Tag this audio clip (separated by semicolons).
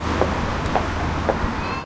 UPF-CS12; foot; steps; walk; floor; SonicEnsemble; percussion